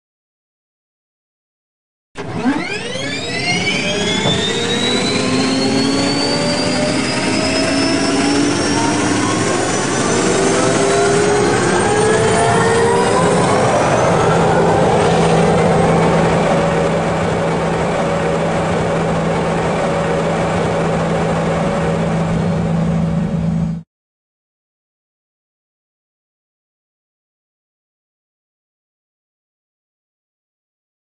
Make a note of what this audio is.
Start-up Sound - U.S. Army M1A1 Tank - Gas Turbine Engine
Start-up Sound of a U.S. Army M1A1 Tank - Gas Turbine Engine. recorded during civilian parade, tanks for display. US Army Testing and displaying M1A1 tank for public. demonstration of turn on turbine ignition of the M1A1 tank. originally recorded with accompanying video from device.